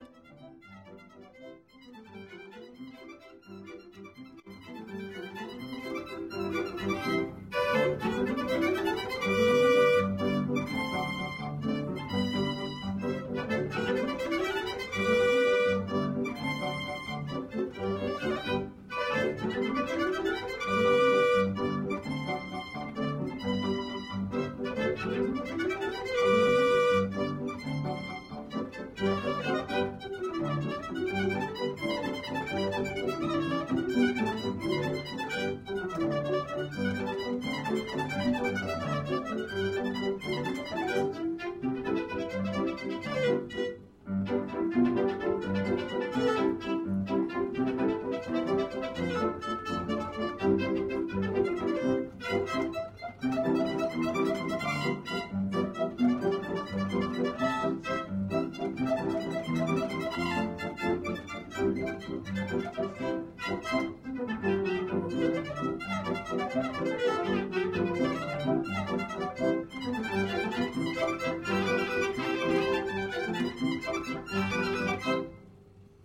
Violano Virtuoso - Self Playing Violin and Piano
This beautiful instrument was built for the World Fair (Exposition Universelle) in 1900. It is was a privilege to be able to record.